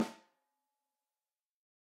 KBSD-TLM103-VELOCITY0
This sample pack contains 109 samples of a Ludwig Accent Combo 14x6 snare drum played by drummer Kent Breckner and recorded with eight different microphones and multiple velocity layers. For each microphone there are ten velocity layers but in addition there is a ‘combi’ set which is a mixture of my three favorite mics with ten velocity layers and a ‘special’ set featuring those three mics with some processing and nineteen velocity layers, the even-numbered ones being interpolated. The microphones used were a Shure SM57, a Beyer Dynamic M201, a Josephson e22s, a Josephson C42, a Neumann TLM103, an Electrovoice RE20, an Electrovoice ND868 and an Audio Technica Pro37R. Placement of mic varied according to sensitivity and polar pattern. Preamps used were NPNG and Millennia Media and all sources were recorded directly to Pro Tools through Frontier Design Group and Digidesign converters. Final editing and processing was carried out in Cool Edit Pro.
14x6, accent, beyer, drums, josephson, layer, microphone, mics, multi, neumann, sample, samples, technica, velocity